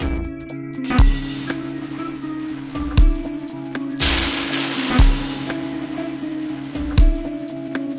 beat combio 8
guitar, explosion sound and beat sampled by Logic
120-bpm, beat